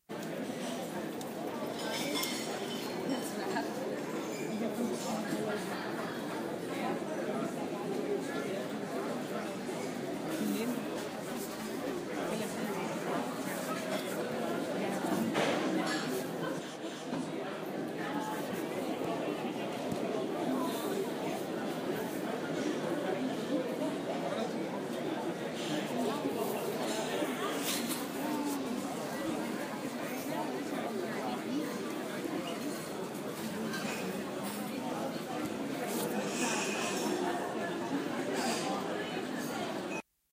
Airport Terminal 01
Recorded at Terminal 3 Schiphol airport Amsterdam